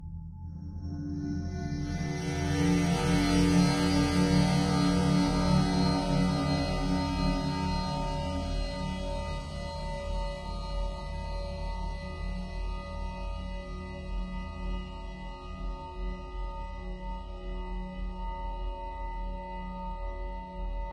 Level Up
My take on a level-up sound I remembered from the past should be like today. Recorded on a Zoom H2 of a large bell originally.